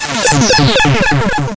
Sliding alert
An alarm sound with cutoff slide.
Created using BFXR
lab, video-game, videogame, video, info, oldschool, synthesizer, tlc, BFXR, sfx, computing, 8-bt, bleep, alert, arcade, analog, future, cartoon, retro, synth, movie, effect, game, film, alarm, digital